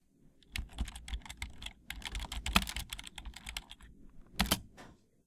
Tapping a keyboard.